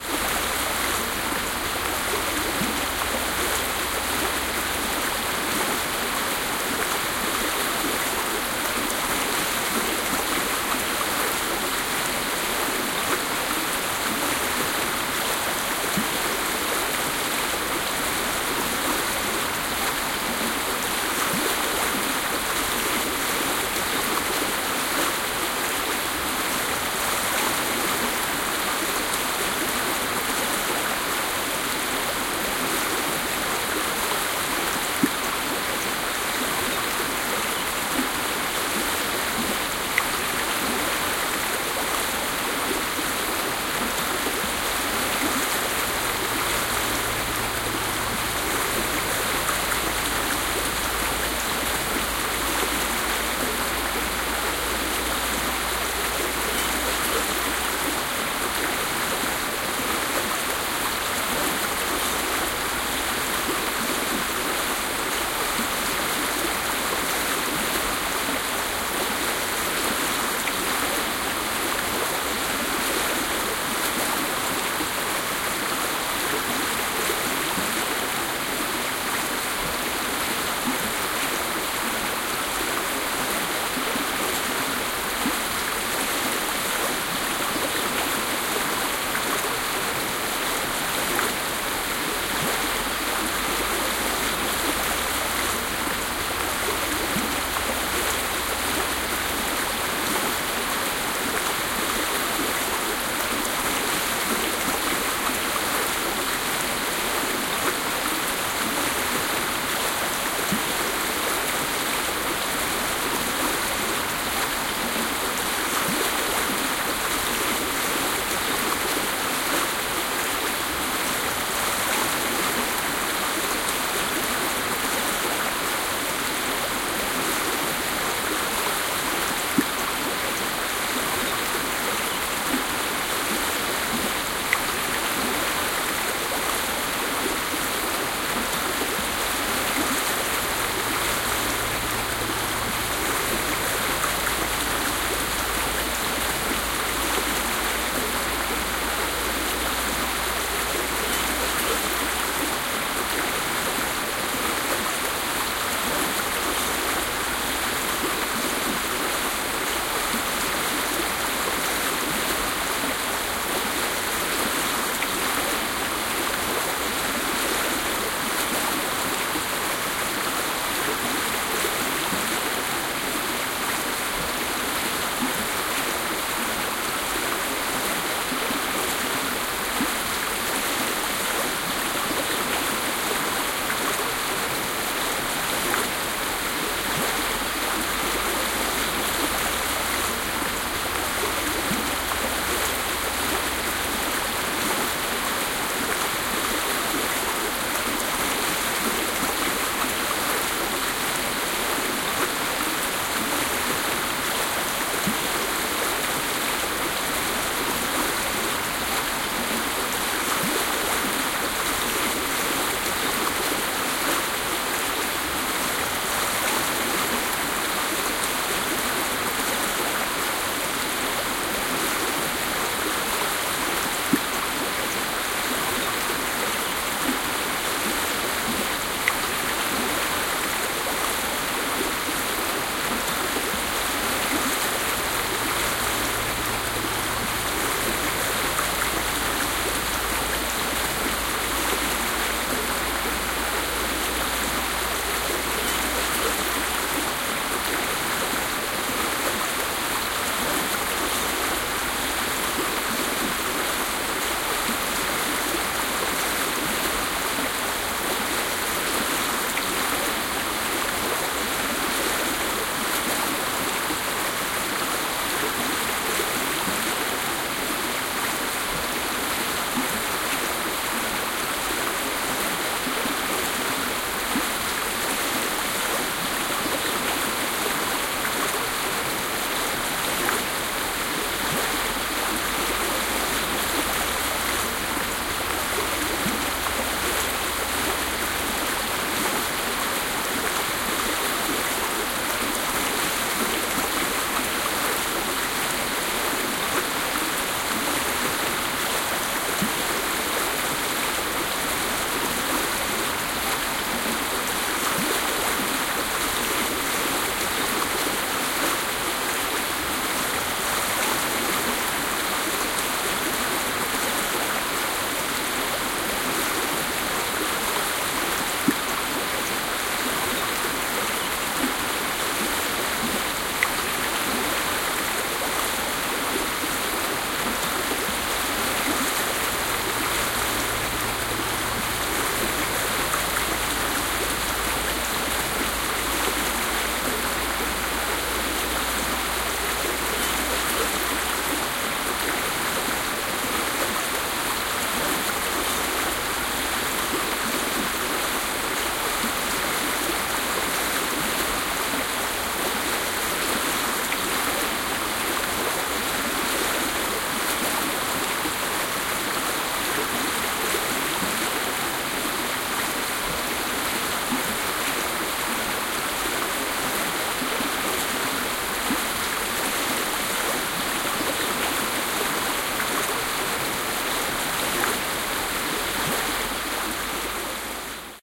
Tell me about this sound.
Remix: A pure mountain stream. It's ready to put in your MP3 player on repeat, for blocking out noise and helping you sleep. I started with sample 19493, another winner, originally recorded by inchadney and did my own edits for this application.
insomnia, mask-noise, meditation, meditative, nature, noise, relaxation, relaxing, ringing-in-ears, sleep-inducement, soothing, stream, tinnitus, tinnitus-management, water, white-noise